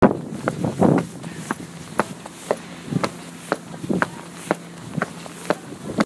windy Footsteps
These footsteps are recorded in slightly windy conditions, despite the wind it is very much hearable
footsteps, walking, windy-walking